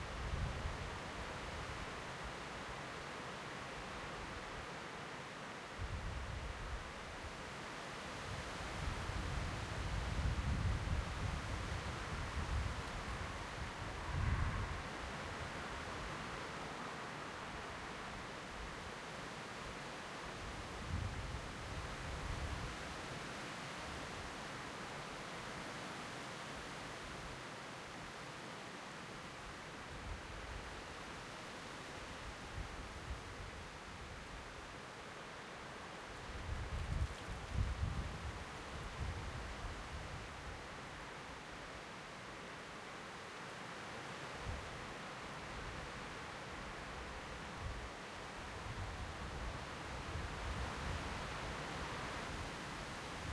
Windy forest, recorded with a Zoom H1.
field-recording, forest, nature, tree, trees, wind, windy, woods